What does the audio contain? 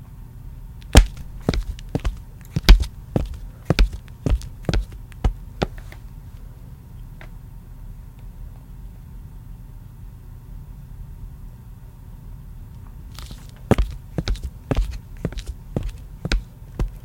walking footsteps flat shoes tile floor 2
A woman walking on tile floor in flat shoes (flats). Made with my hands inside shoes in my basement.
female, flat, flats, floor, shoes, tile, walking